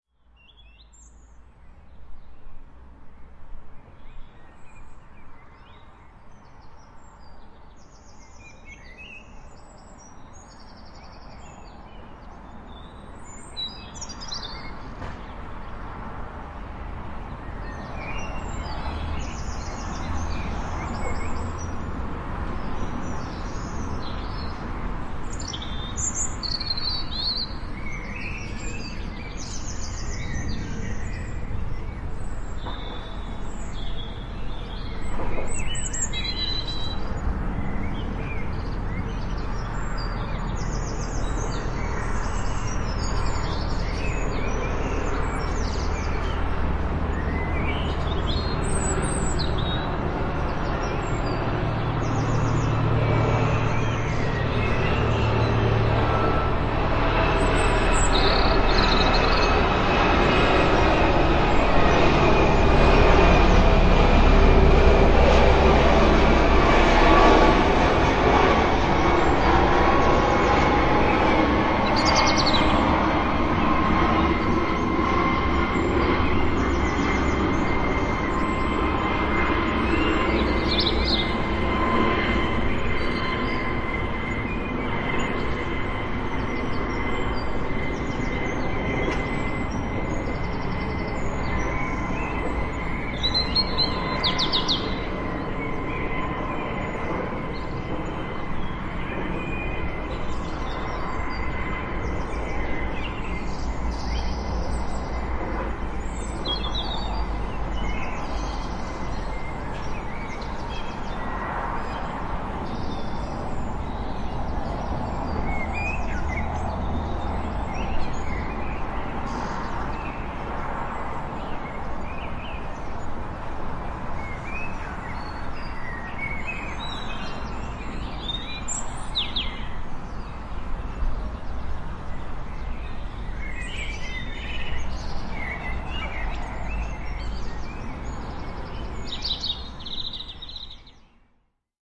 birdsong in suburbia1a
First in a series of 3 consecutive recordings of the sound of summer birdsong in a suburban English setting. This one features the sound of a large airliner passing overhead from right to left, with clear stereo separation, doppler effect, and receding fade out. Common suburban evening birdsong can be heard clearly throughout with distant traffic noise in the background.
Recorded on a summer evening in June 2016 in Southern England using a Rode NT-4 microphone in a Rycote Lyre shock mount, Marantz PMD661 and edited using Adobe Audition.
stereo, background, traffic, suburban, background-sound, distant, fade-out, atmosphere, plane, soundscape, cars, airplane, field-recording, street, X, engine, atmospheric, summer, nature, Y, aeroplane, birdsong, evening, doppler, birds, overhead, airliner, jet, ambient, reverb